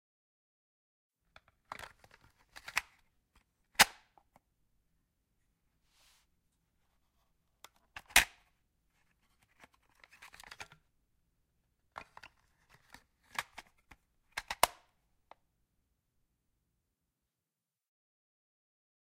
Sound of the Cassette Tape